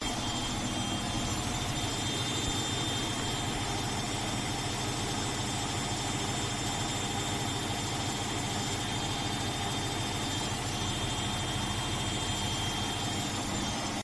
Washing machine work
clothes, clothing, dryer, laundry, machine, wash, washer, washing, washing-machine